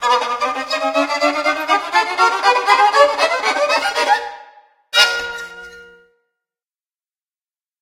Violin Abuse
Effect of someone who can't play the violin... but he's trying
pain, violin, ear, abuse, noise